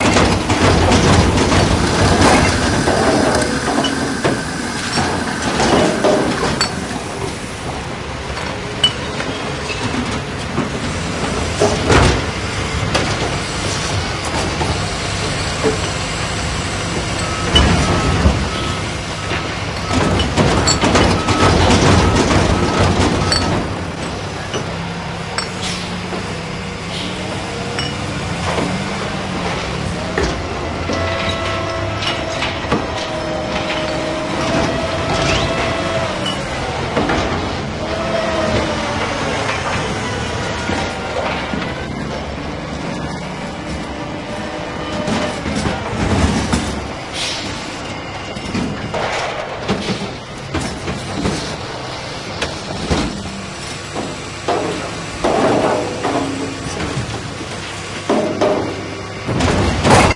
the sound of garbage trucks and containers